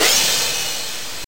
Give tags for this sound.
arcade; vgm; chip; 8-bit; retro; chiptune; chippy; video-game; lo-fi; decimated

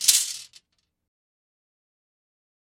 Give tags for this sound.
foley; copper; crash; tubing

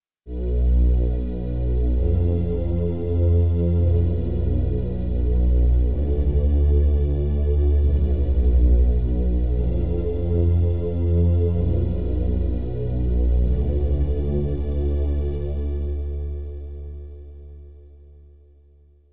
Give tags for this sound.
space; pad; hollywood; spooky; suspense; cinematic; sci-fi; thiller; horror; film; dramatic; atmosphere; mood; background-sound; dark; ambient; drama; scary; background; movie; music; deep; drone; ambience; soundscape; thrill; trailer

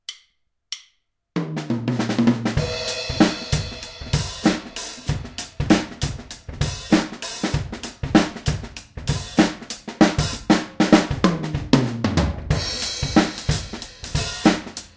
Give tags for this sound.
beat; shuffle; gretsch; drums; fill; ride

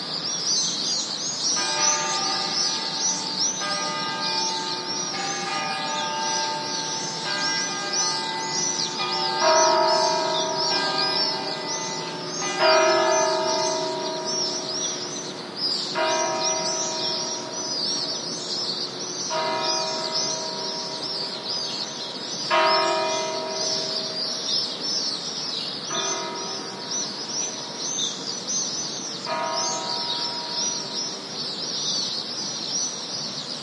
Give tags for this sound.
ambiance; birds; church-bells; field-recording; screeching; seville; south-spain; swift

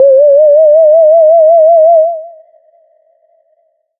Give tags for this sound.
multisample; horror; whistle; reaktor

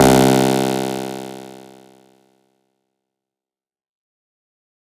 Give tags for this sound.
blip; rave; porn-core; techno; house; synthesizer; electronic; processed; bpm; random; effect; hardcore; electro; synth; 110; sci-fi; bounce; dark; dub-step; glitch; resonance; lead; glitch-hop; sound; acid; trance; noise; club; dance

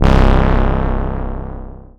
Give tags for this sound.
agressive; synthetizer; noisy

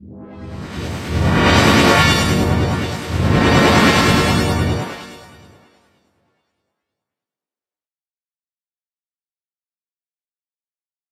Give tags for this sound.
strange; electronic; granulated; experimental

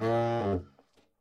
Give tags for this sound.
jazz; sampled-instruments; vst; baritone-sax; sax; woodwind; saxophone

